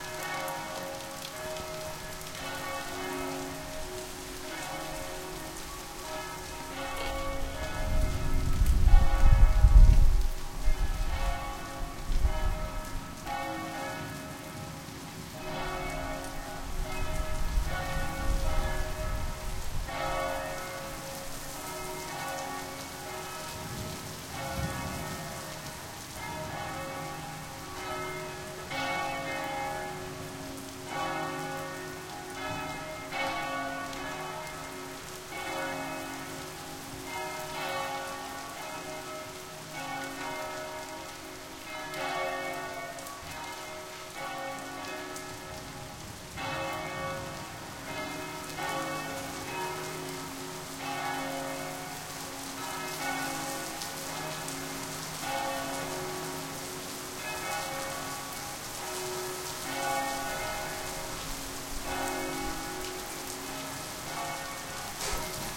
rain and bells4
rain and bells
bells, rain